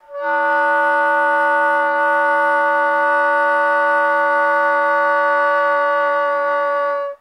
I found the fingering on the book:
Preliminary
exercises & etudes in contemporary techniques for saxophone :
introductory material for study of multiphonics, quarter tones, &
timbre variation / by Ronald L. Caravan. - : Dorn productions, c1980.
Setup: